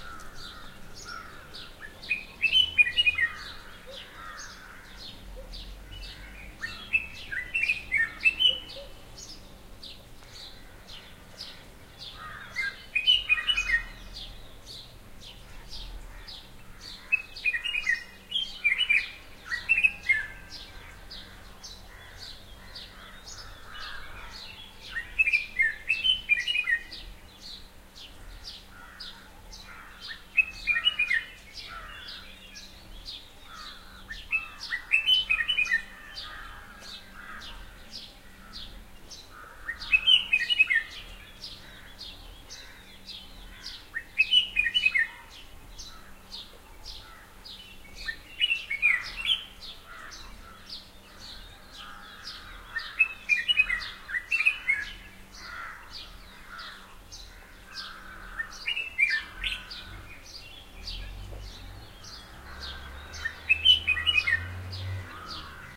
A Red whiskered Bulbul singing at dawn from a shed roof with House Sparrows in the background. Near Munnar, Kerala, 11th February 2006.
asia, birdsong, birdsounds, bulbul, dawn, field-recording, india, kerala, nature